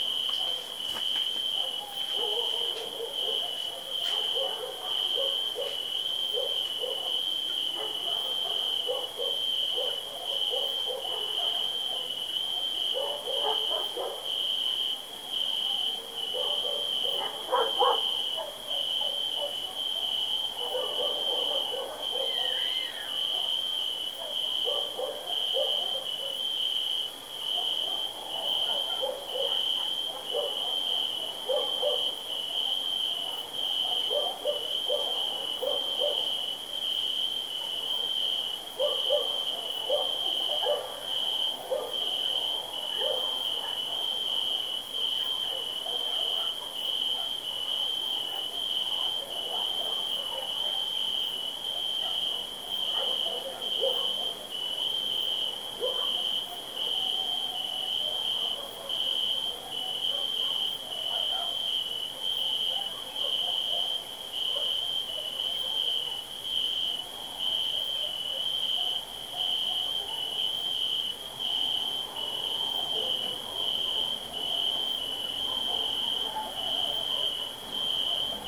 one warm summer evening I recorded these adorable crickets in a small village